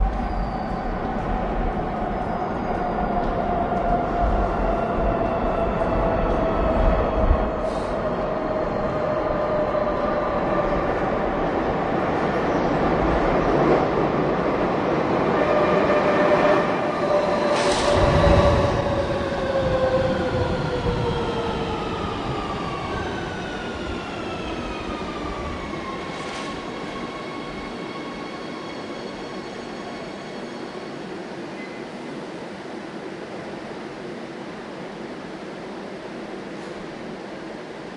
the arrival of a train at the subway station.
subway, madrid, train